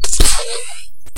laser shot
A sound i I've made in a few minutes with Audacity.
advanced, furure, laser, robot, shot, system, weapon